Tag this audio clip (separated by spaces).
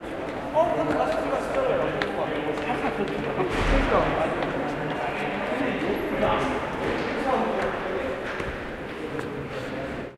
museum steps footsteps ambience british-museum voices field-recording atmosphere